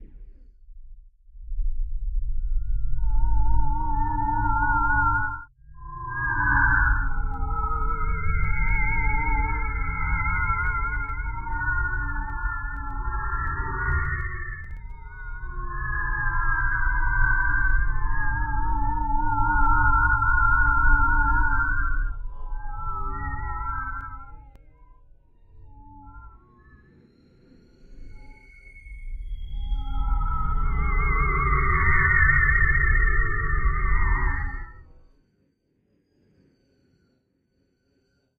barcelona creepy05
Created in the image synth room of Metasynth. A plan image of Mies van der Rohe's Barcelona Pavilion waqs imported and then echo added. A multi-sampler of my own voice was used to synthesize it in a quarter-tone mapped scale.
Mies-can-der-rohe, creepy, graphic-synthesis, hoovering, metasynth, synthesized